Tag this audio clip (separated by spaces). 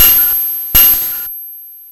hits hammertone drums a oneshot beatz than higher glitch distorted 505 bent circuit